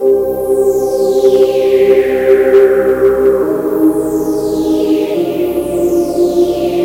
Pad sequence with low distortion